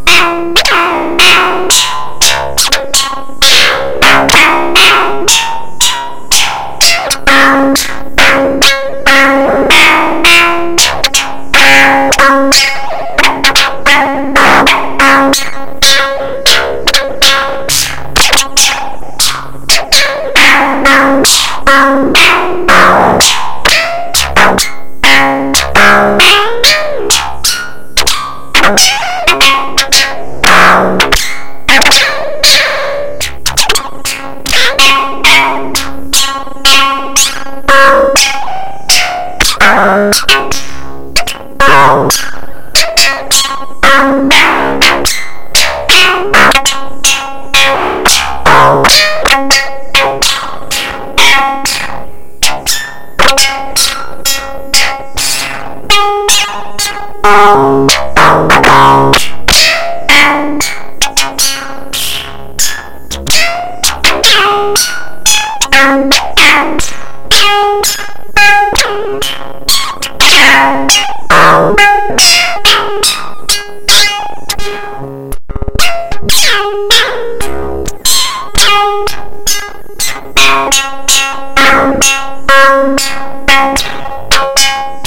Dirty string like pattern .
Analog, DIY, Modular, Pattern